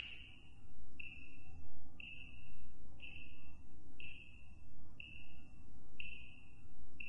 watch tics 5
This from recording from my wristwatch and amplified and cleaned up a lot.